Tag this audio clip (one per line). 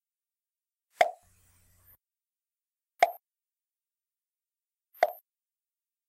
coconut-pop
coconut-shell
hit-on-head
knock
pop